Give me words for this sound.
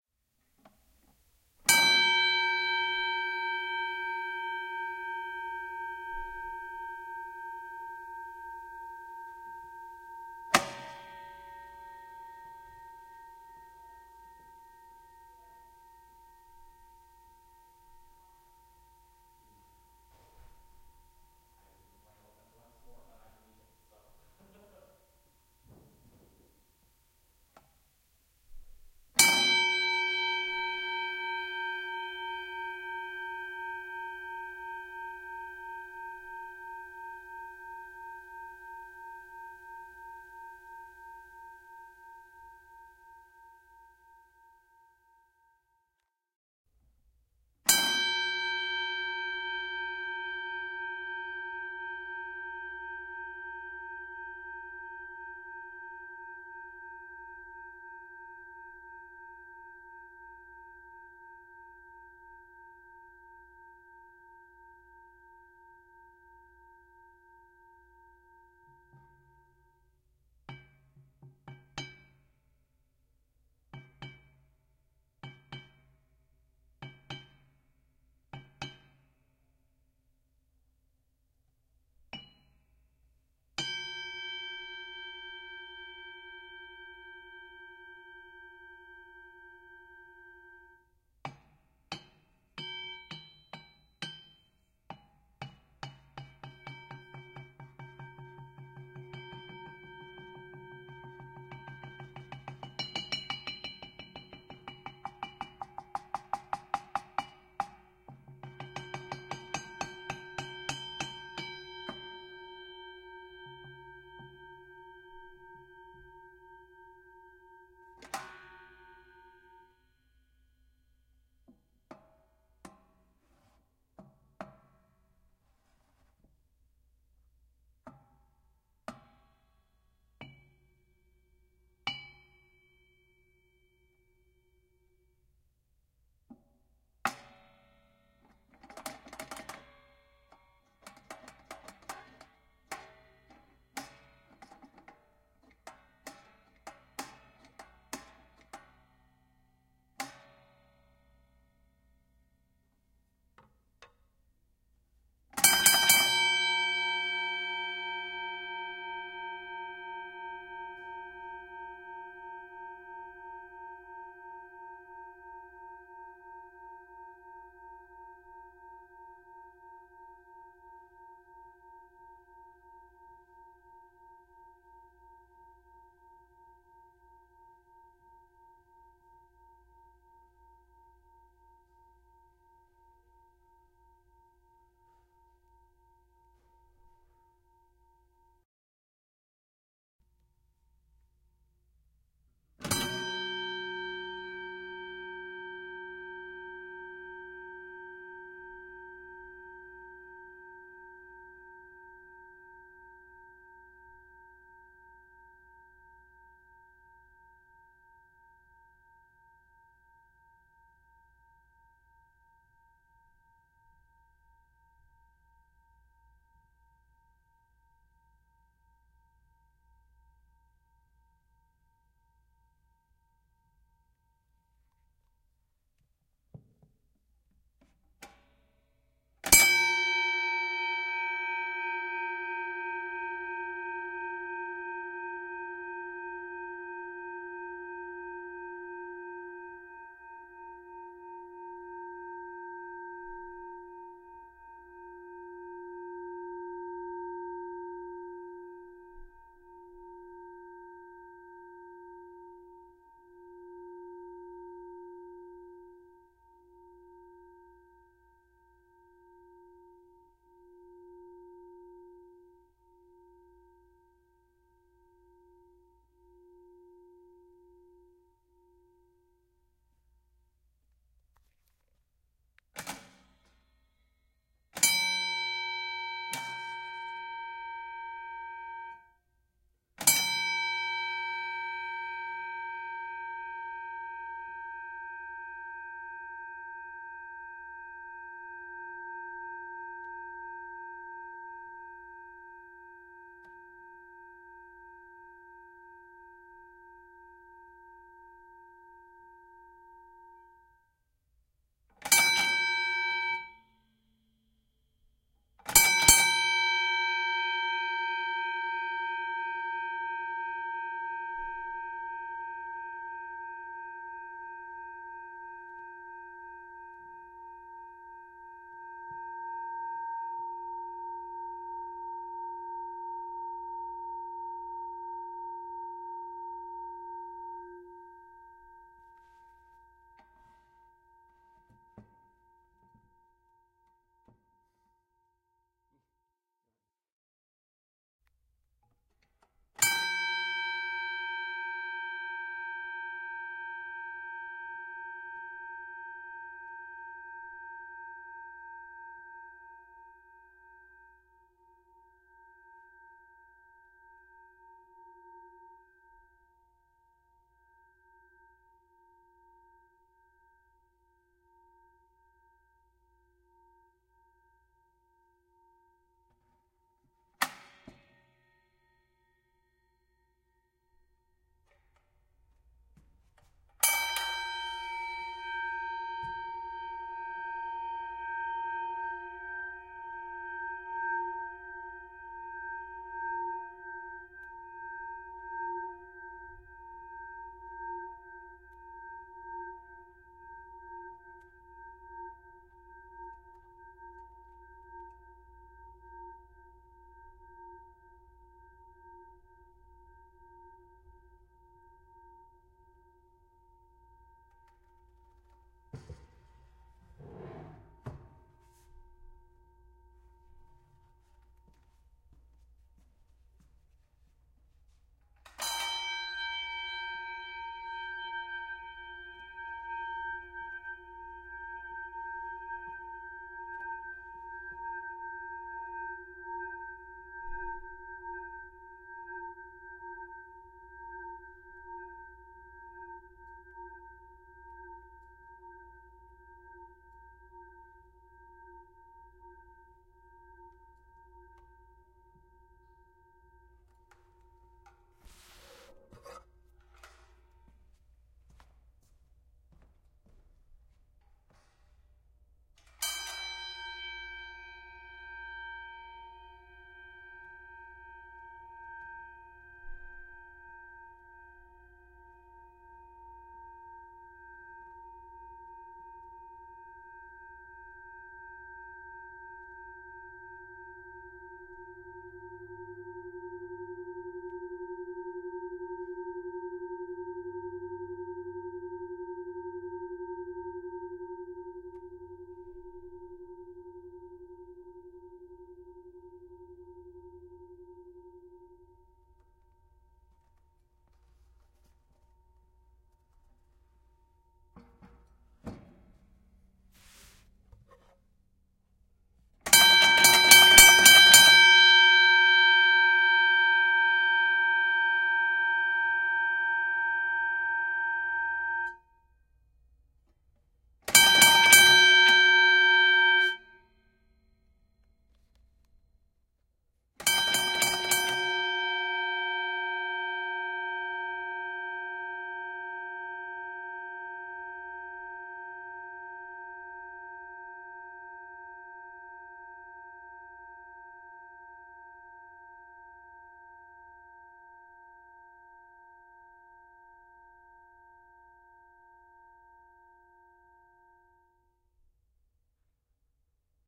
180820 Boxing bell, various rings dings pulses close distant, Soulpepper TORONTO
Various rings and dings from boxing bell.
ring, ding, bell, boxing